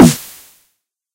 Punchy Snare 1
I guess you could call it punchy. I used a basic snare with a little white noise, a high pitched punchy tom, and a little white noise. I EQ'd the snare and tom to 200Hz at around the 6db range.
punchy, snare